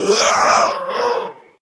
Cyborg Death 2
Death sounds for a robot or cyborg.
dying, human, cyborg, pain, hurt, robot